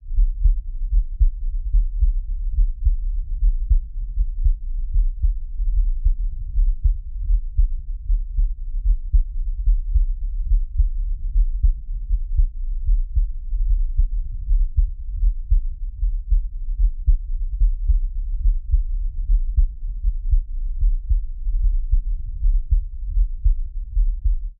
Sound of human heartbeats. Sound recorded with a ZOOM H4N Pro.
Son de battements de cœur humain. Son enregistré avec un ZOOM H4N Pro.
blood, body, breath, heart, heart-beat, heartbeat, human, irregular, monitor, natural, organic, sound, stethoscope